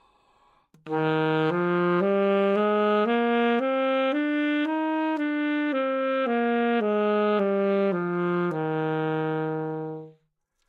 Sax Alto - D# Major
Part of the Good-sounds dataset of monophonic instrumental sounds.
instrument::sax_alto
note::D#
good-sounds-id::6823
mode::major